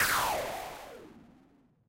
Falling effect frequently used in electro house genre.